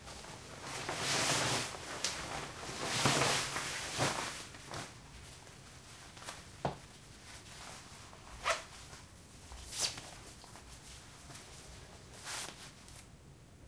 Dressing-polyester-pants
Dressing polyester pants --> soft synthetic sound